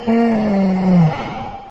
Loud Ooh-TiSh 01
Someone sighing breathing out from my university group.
snoring, unprocessed, sleeping, muffled, ooh, loud